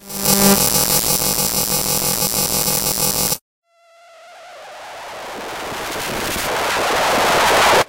unfriendly synth noise done with Blackbox from arcDev Noise Industries